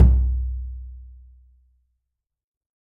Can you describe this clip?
Frame drum oneshot RAW 14
Recording of a simple frame drum I had lying around.
Captured using a Rode NT5 microphone and a Zoom H5 recorder.
Edited in Cubase 6.5
Some of the samples turned out pretty noisy, sorry for that.
deep, drum, drumhit, drum-sample, frame-drum, hit, low, oneshot, perc, percussion, raw, recording, sample, simple, world